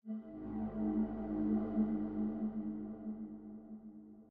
PsyC3hollowshadow
Hollow sound passing through the shadows.
creepy, terror